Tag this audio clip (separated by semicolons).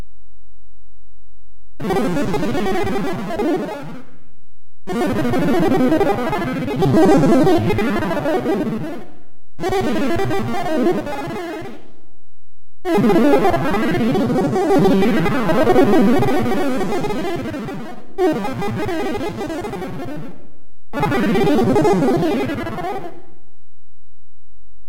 BizzarreCGA; Chatter; Synthetic; Unusual; Weird